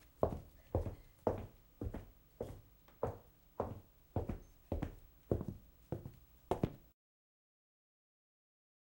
Footsteps on hard surface.